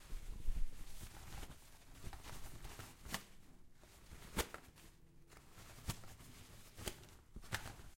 Tearing toilet paper from a toilet roll.
OWI, roll, tearing-toilet-paper, toilet, toilet-roll